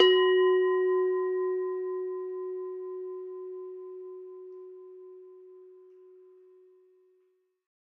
mono bell -8 F# 8sec
Semi tuned bell tones. All tones are derived from one bell.
bell, bells, bell-set, bell-tone, bong, ding, dong, ping